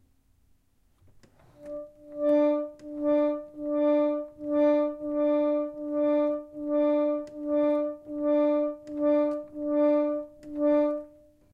Pump Organ - Mid D
Recorded using a Zoom H4n and a Yamaha pump organ
d, d3, note, organ, pump, reed